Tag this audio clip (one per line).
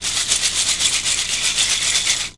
bag
brick
click
drop
fall
LEGO
plastic
shake
toy